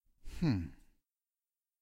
AS076583 Reflection
voice of user AS076583